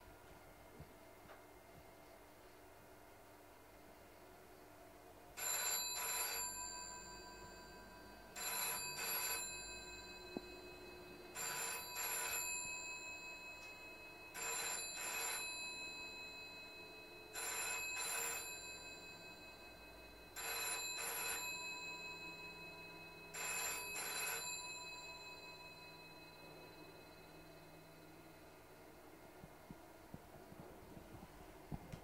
Phone ringing 7 times in another room UK GPO 746
The sound of a British telephone from the 1970s, a model GPO 746, ringing 7 times in another room. It's quiet but can be boosted.
746, Telephone, GPO, room, British, model, another, Ringing